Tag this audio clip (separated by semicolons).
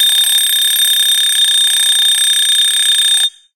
bell; ringing; ringing-bell